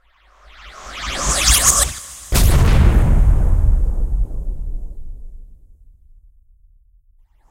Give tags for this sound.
broadcasting
Sound

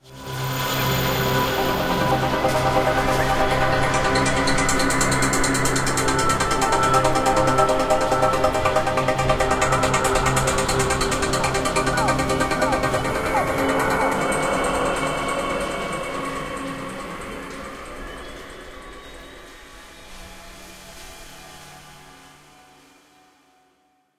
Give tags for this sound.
ambience
pad
trip
female
heaven
demon
angel
cinematic
hell
chord
vocal
synth

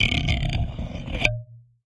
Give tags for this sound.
close
contact
effect
fx
metal
microphone
sfx
sound
soundeffect
tweezers

